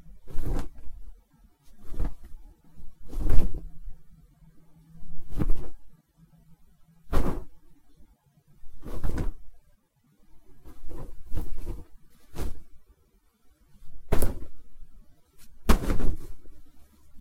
cloth flaps 1
wind, swish, fabric, cloth, flap, whip